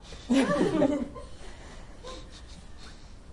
group laugh8
A group of people laughing. These are people from my company, who listen story about one of them.
Recorded 2012-09-28.
AB-stereo